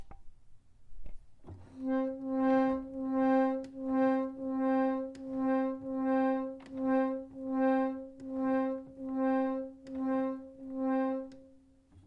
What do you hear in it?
Pump Organ - Mid C
Recorded using a Zoom H4n and a Yamaha pump organ